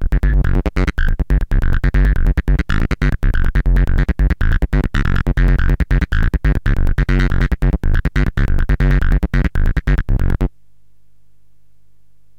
bass rumble tweaking 140bpm analog distortion
140bpm, analog, bass, distortion, rumble, tweaking